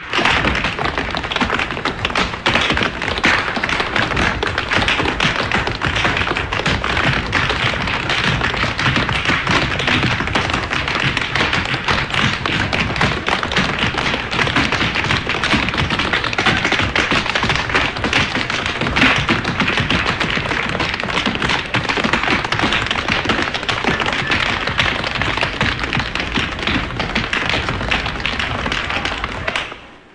Tap Dancers FF001
Many tap dancers dancing on a stage, medium distance, sounds old, reverb. Int.